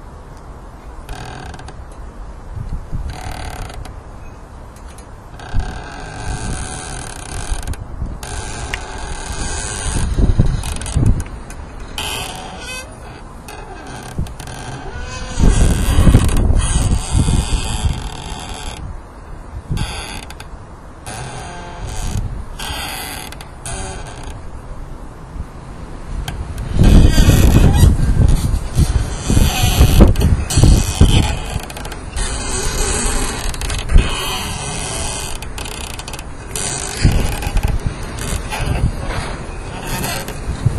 thuja squeaking in wind3
thujas squeaking in the wind and rubbing against a wooden fence not-processed. recorded with a simple Olympus recorder
tree, thuja, squeak, wind